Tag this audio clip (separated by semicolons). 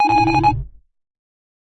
beep; splash; alarm; sound-design; typing; resonancen; button; weird; freaky; digital; push; abstract; computer